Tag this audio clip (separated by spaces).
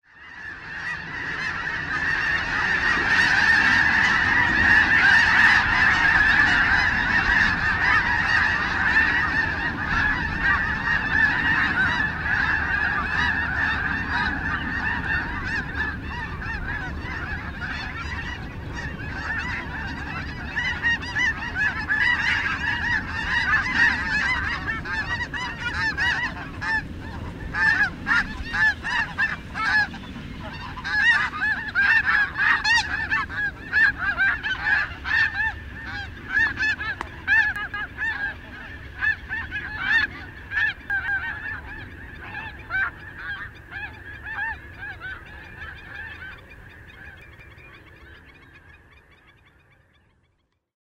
chen-caerulescens snow-geese